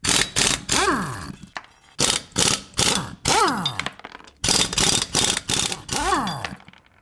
Sound of an air impact wrench FORTGPRO-FG3200 recorded by SONY ICD-PX312